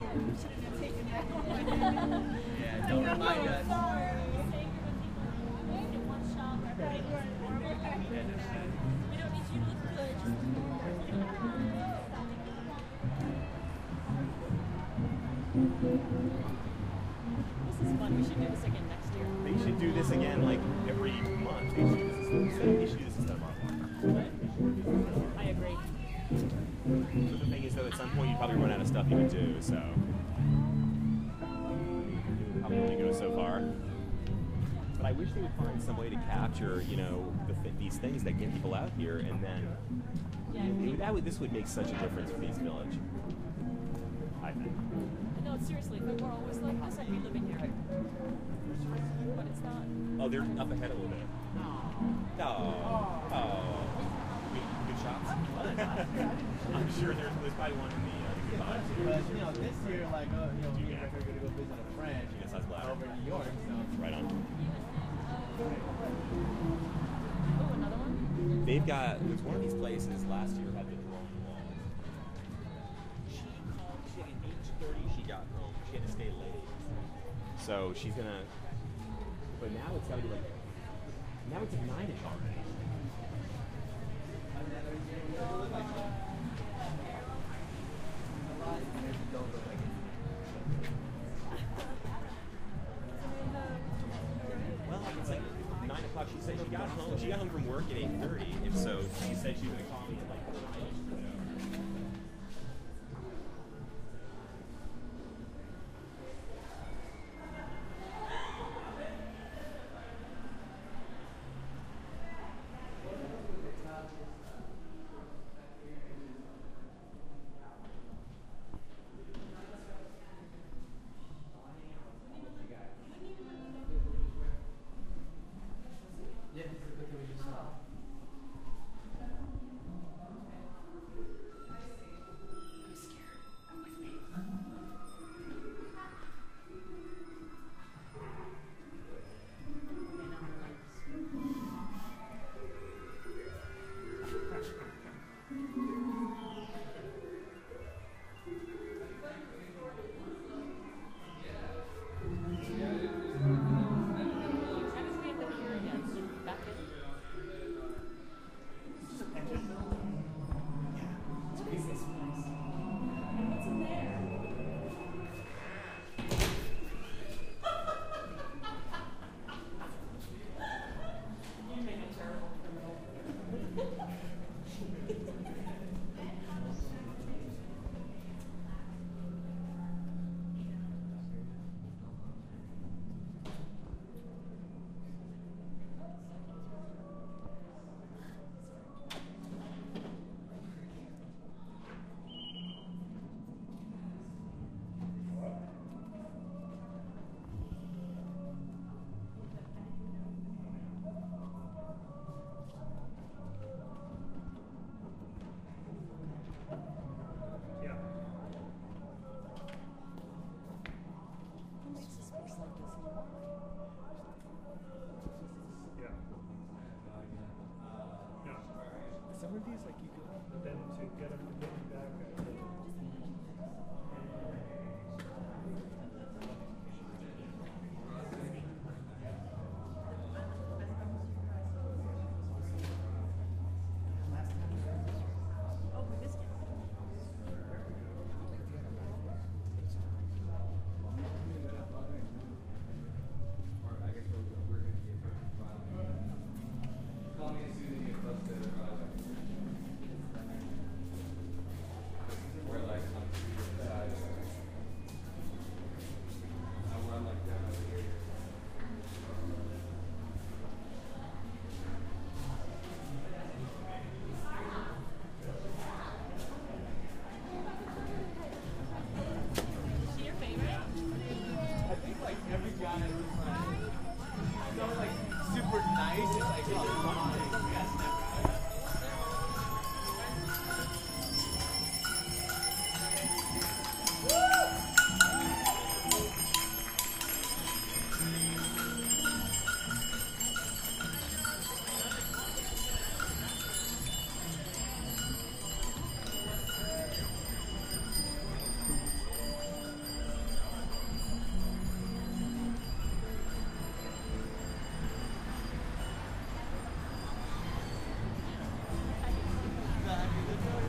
This is a part of a set of 17 recordings that document SoundWalk 2007, an Audio Art Installation in Long Beach, California. Part of the beauty of the SoundWalk was how the sounds from the pieces merged with the sounds of the city: chatter, traffic, etc. This section of the recording features pieces by: Fluorescent Grey; Midnight Gardeners; Mannlicher Carcano; Miha Ciglar; Daniel Corral; Robert Strong; Bicycle Bell Ensemble
california, long-beach, sound-art, sound-installation, soundwalk-2007